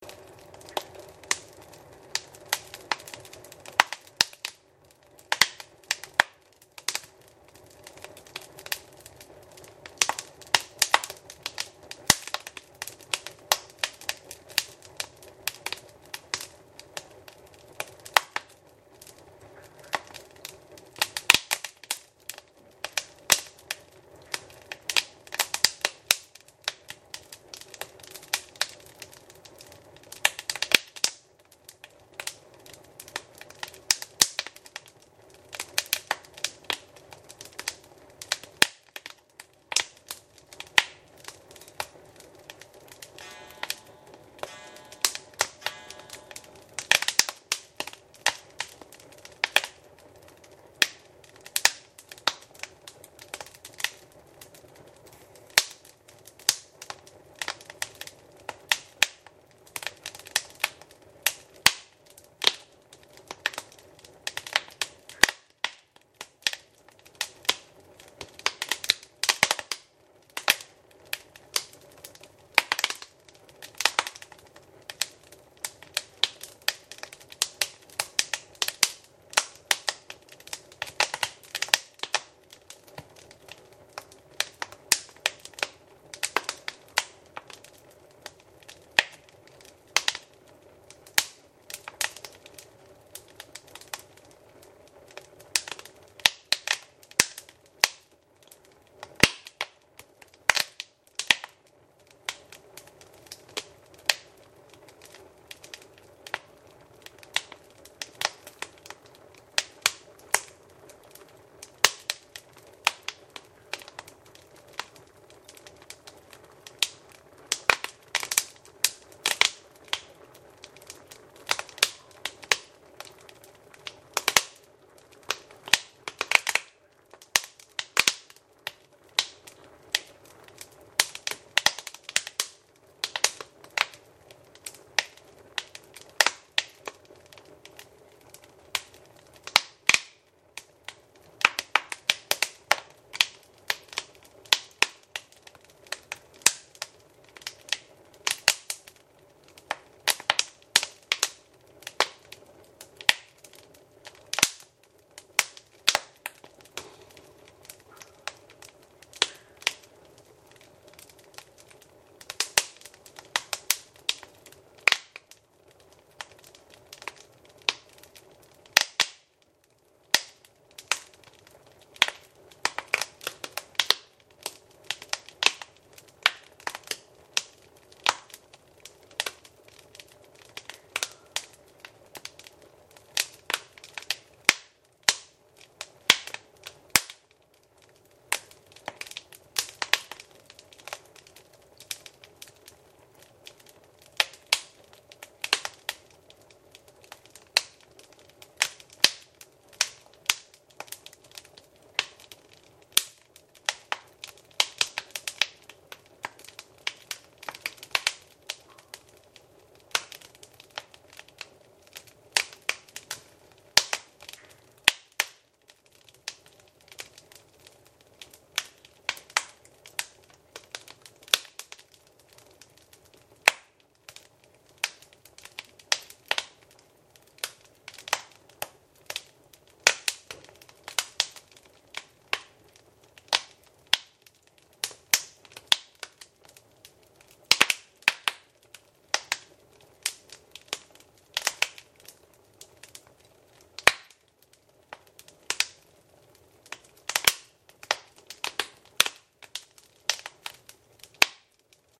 Fire crackles in the fireplace
The sound of a fire burning in the fireplace
burn, burning, crackle, crackling, fire, fireplace, flame, flames, mountains, tatra